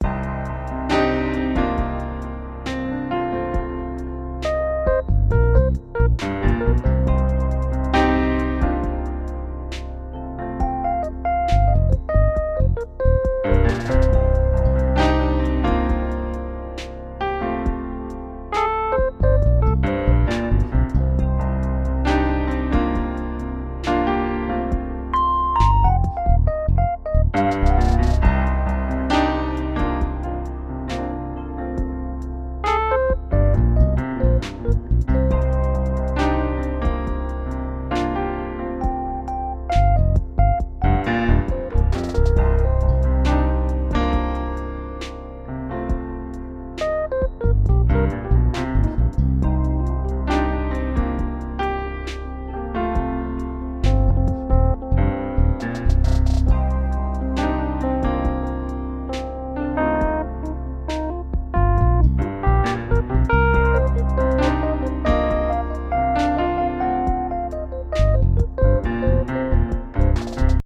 Smooth 68.3 CM
These loops are a set of slow funk-inspired jazz loops with notes of blues overlaying a foundation of trap drums. Slow, atmospheric and reflective, these atmospheric loops work perfectly for backgrounds or transitions for your next project.